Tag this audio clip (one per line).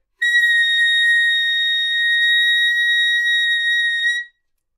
multisample
good-sounds
clarinet
single-note
Asharp6
neumann-U87